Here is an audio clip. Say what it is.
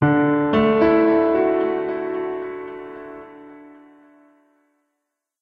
Positive and affirmative, part of Piano moods pack.
calm, delay, mellow, mood, phrase, piano, reverb